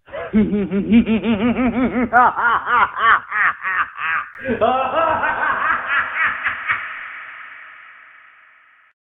The Matt Laugh
crazy, evil, insane, laugh, laughing, laughter